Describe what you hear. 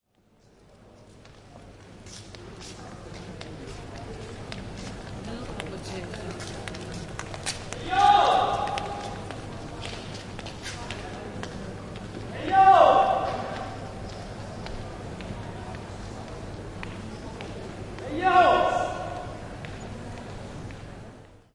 Plaça del Pi 2
Recording in stereo with a PMD660 and a AT822 microphone. Recording without editing. In the heart of the Gothic district of Barcelona (Spain)12 of august of the 2005. To 12 at nightSomeone is calling...